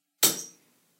Metallic sounding shot or hammer blow, might be suitable for nail gun or thin, small breakable objects.
thin, plate, gun, blow, hammer, nail, shot, breakable, agaxly